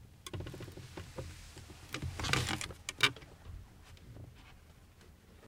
chair sitting 8
By request.
Foley sounds of person sitting in a wooden and canvas folding chair. 8 of 8. You may catch some clothing noises if you boost the levels.
AKG condenser microphone M-Audio Delta AP
chair, creek, foley, sit, soundeffect, wood